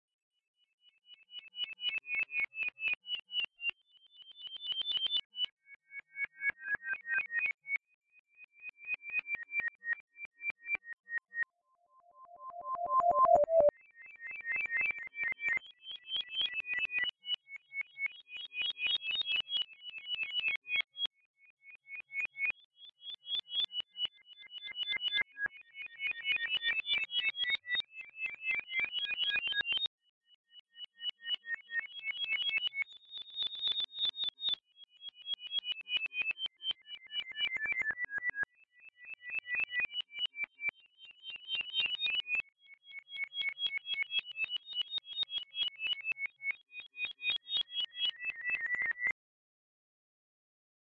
Made with amsynth, edited in ReZound